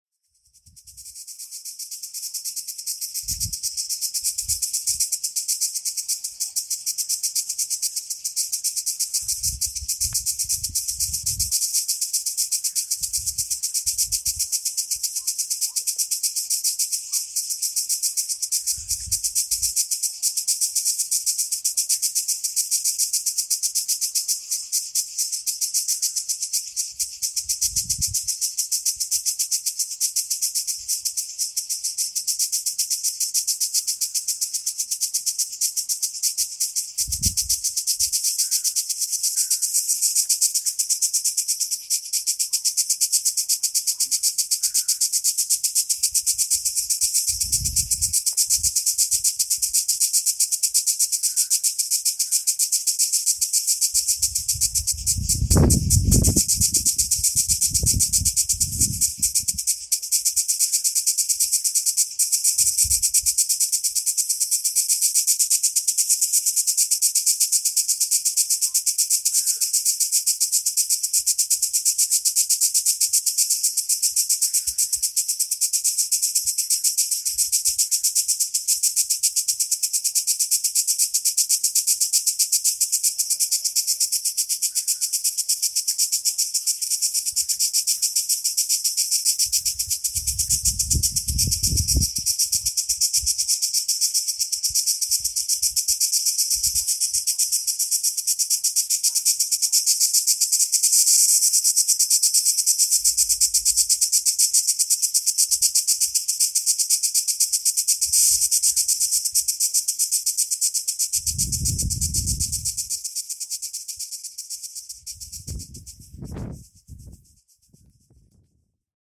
Cricket Croatia
Cricket song recorded in Dalmatia, Croatia. Adriatic Sea.
THANK YOU!
Paypall:
adriatic, crickets, insects, nature, sea, summer